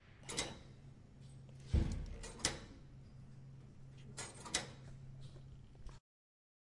Someone is pushing the water source button so as to drink water. It is a metallic sound. It has been recorded with the Zoom Handy Recorder H2 in the restroom of the Tallers building in the Pompeu Fabra University, Barcelona. Edited with Audacity by adding a fade-in and a fade-out.
button, campus-upf, fountain, hall, push, source, tallers, university, UPF-CS14, water, watersource